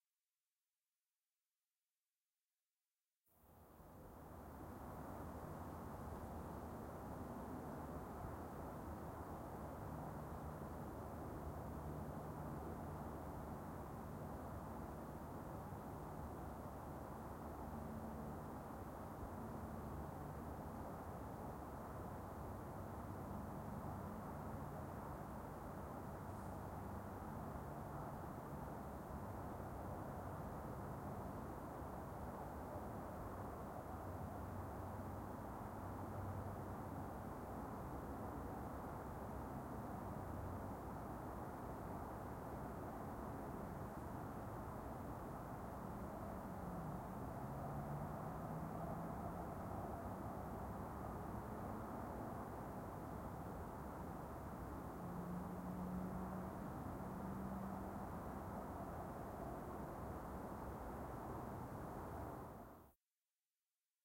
Distant freeway at night april 2010
Recording of night ambiance from a distant to the freeway. Useful as night exterior fill sound in post production. Some nature sounds. Zoom H4n X/Y recording.
general-noise, dutch, exterior, traffic, atmos, ambiance, soundscape, field-recording, background, atmosphere, ambience, woods, ambient, distant, A27, night, background-sound, residential